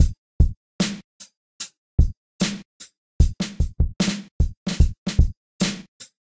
2 bars, panned + chorused hi-hats. some swing on the snare drum.
beat, drums, sequenced